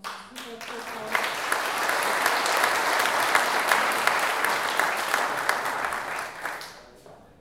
Medium Crowd Clapping 3
A medium sized crowd clapping for a speaker who just presented. Recorded on the Zoom H4n at a small distance from the crowd.
Location: TU Delft Sports & Culture Theater, Delft, The Netherlands
Check out the pack for similar applauding sounds.